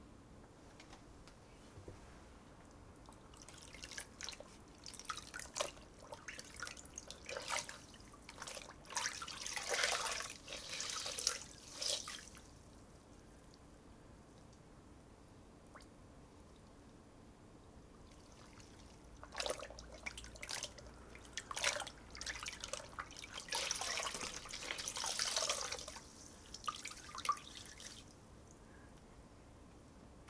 foley water 01
me stirring water in a bucket to sound like a cleaning lady rinsing her cloth.
something I quickly recorded during the night to reach a deadline in time.
cleaning, close, cloth, foley, perspective, softly, water